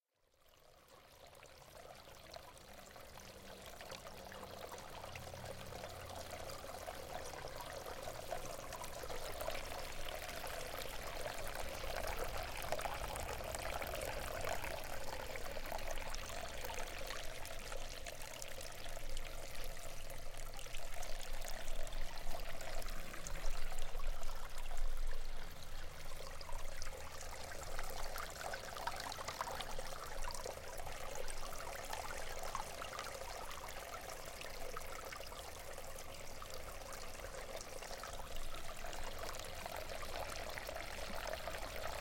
WS running water
wild, water, sound